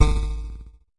STAB 014 mastered 16 bit
An electronic percussive stab. A short electronic pulse. Created with
Metaphysical Function from Native Instruments. Further edited using Cubase SX and mastered using Wavelab.
electronic, percussion